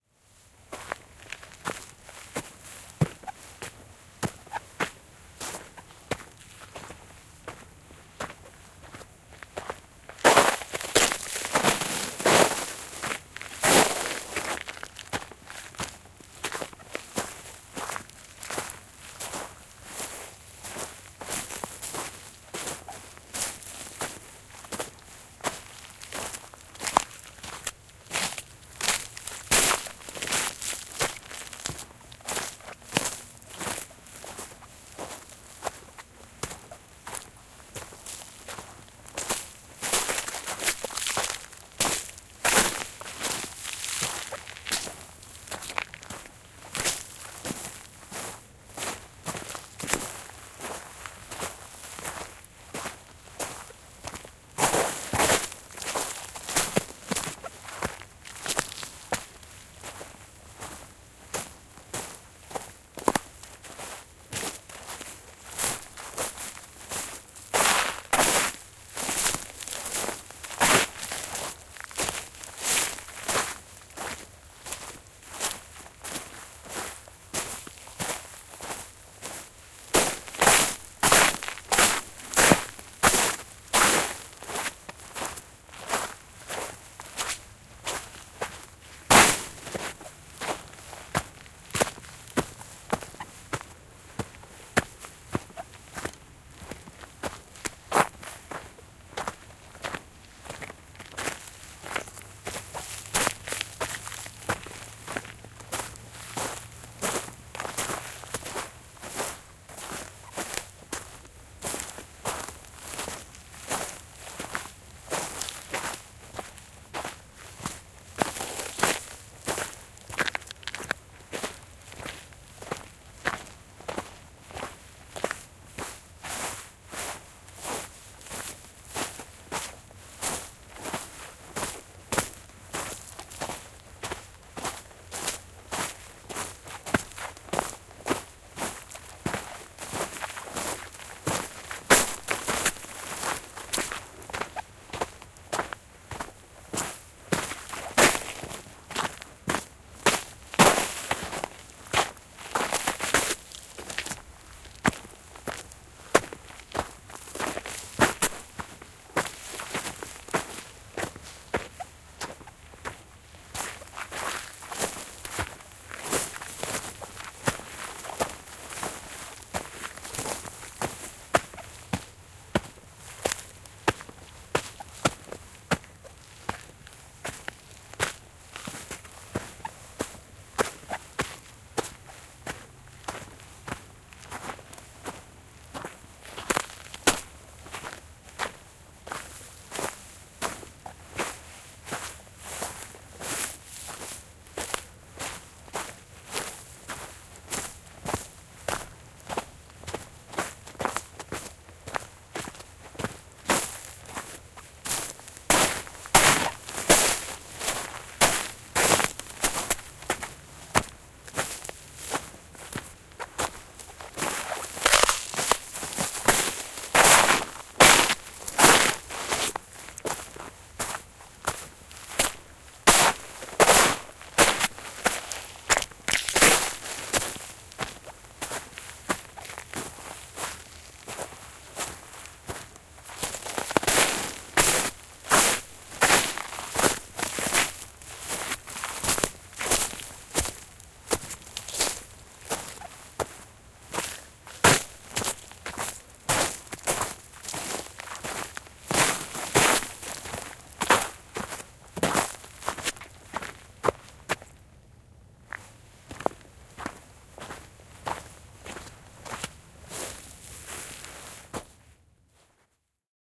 Askeleet metsässä, talvi / Footsteps, walking in the forest in the winter, ice and snow, underbrush, a close sound
Mies kävelee lumisessa ja jäisessä maastossa, metsä, varvikkoa, sammalta, risuja ja heiniä. Pysähdys. Lähiääni.
Paikka/Place: Suomi / Finland / Vihti, Hiidenvesi
Aika/Date: 25.11.1987